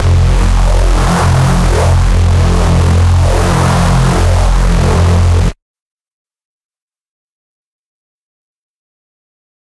multisampled Reese made with Massive+Cyanphase Vdist+various other stuff
processed, hard, distorted, reese